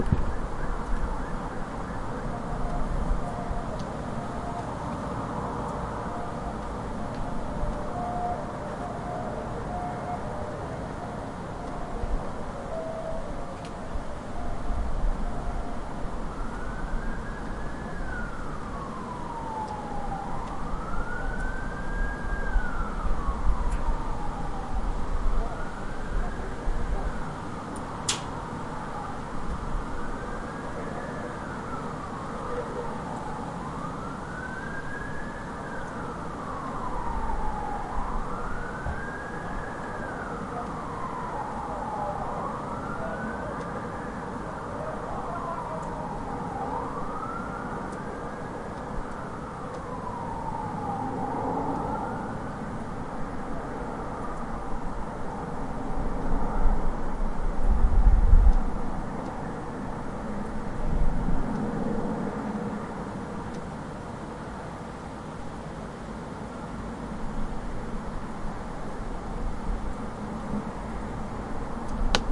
London City Air Tone
Air tone of city.
Recorded with Zoom H4n un-processed no low or high cut.